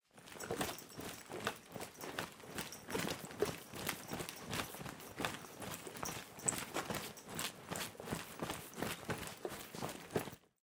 Tools Backpack RattleMovement 001
Foley effect for a person or character moving with a backpack or book bag.
backpack, bag, clothing, gear, movement, moving, tools